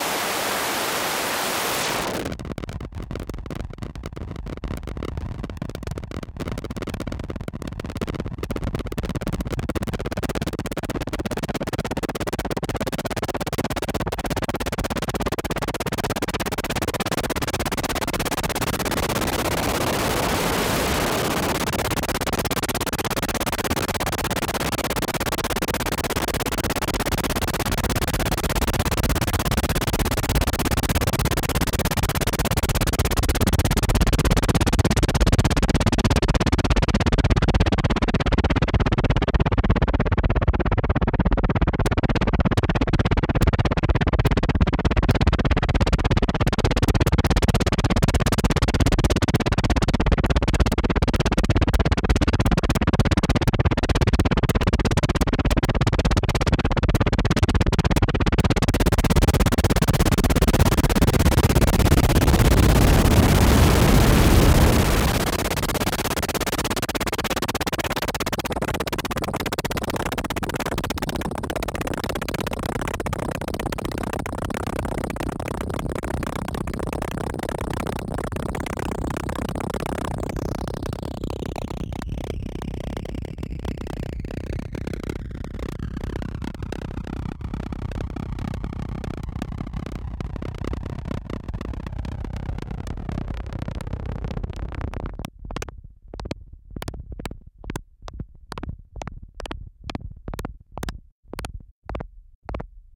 Made with modular synth